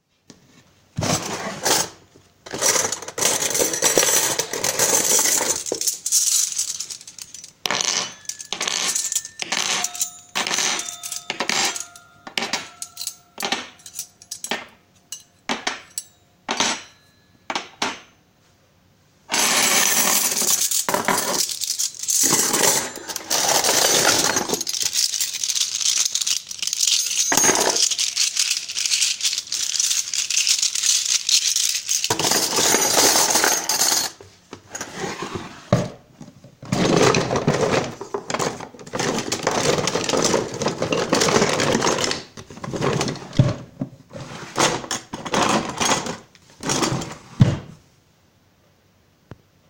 Drawer silverware forks and spoons
fork, spoons, cutlery, metal, drawer, forks, knife, spoon, silverware, kitchen